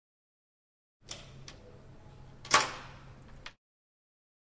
Locker open
This sound shows the noise that a locker does when someone is opening it.
campus-upf, Locker, Tallers, Open, Key, UPF-CS14